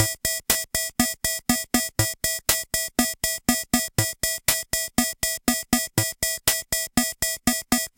The electronic cha-cha rhythm from a MusiTech MK-3001 keyboard. Recorded through a Roland M-120 line-mixer.